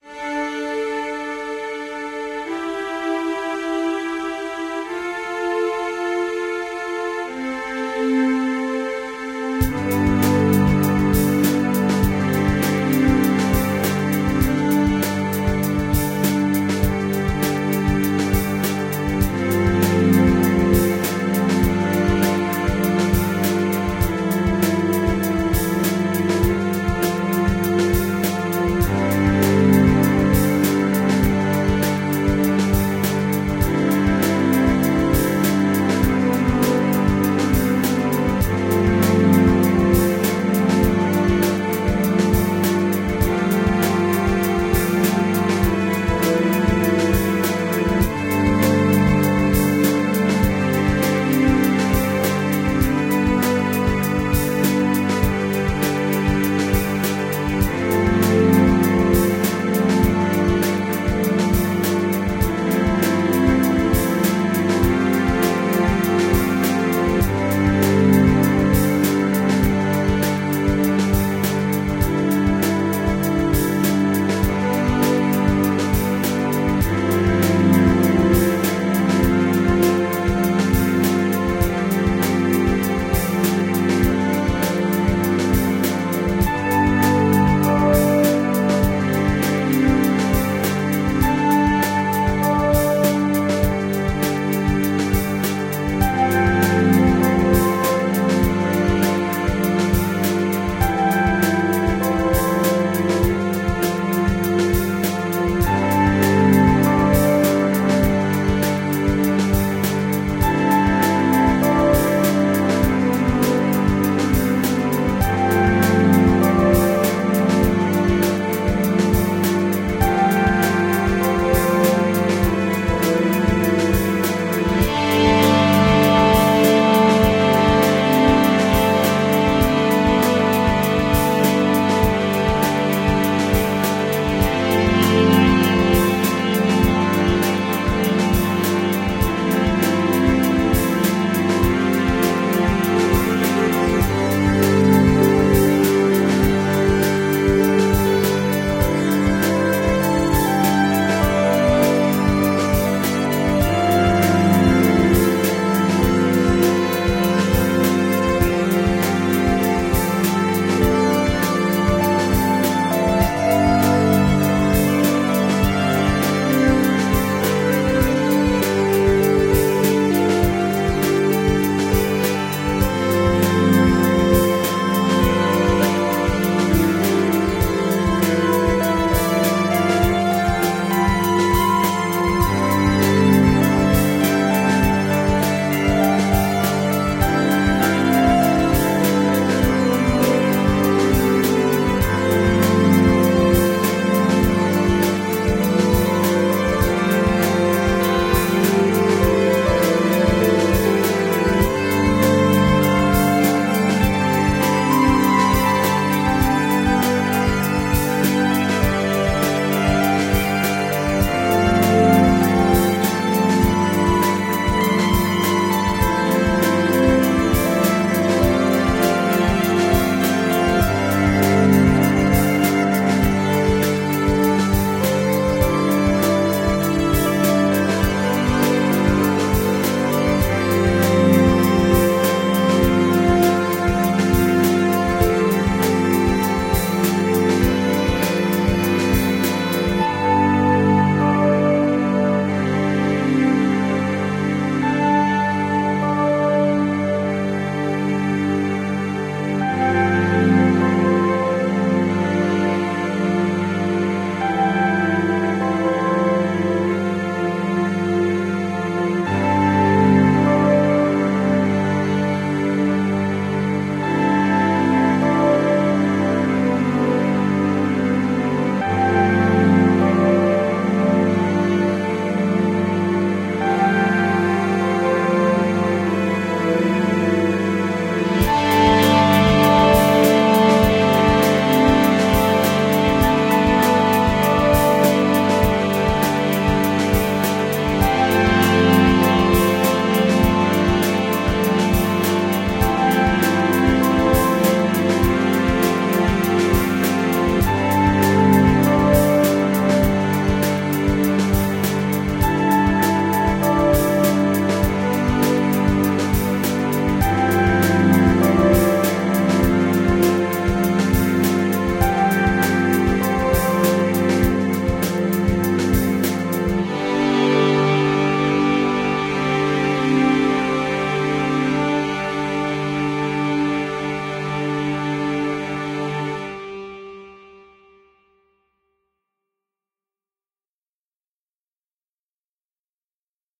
enigmatic adventure
An easy listening background soundtrack with strings and simple electronic motives. A new case is to be solved by the great Detective, whose name is being kept in secret...